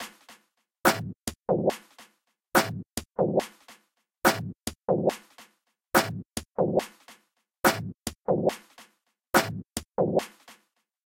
glitch step
glitch, idm, step